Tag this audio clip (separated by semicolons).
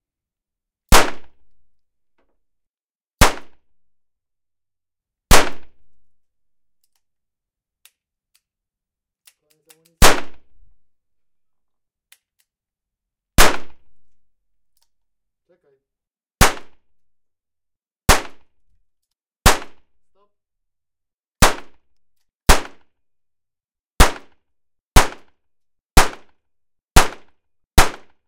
gun; gunshot; revolver; shot